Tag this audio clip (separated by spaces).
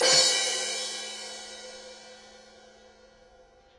drums crash-cymbal splash stereo mid-side 1-shot crash percussion DD2012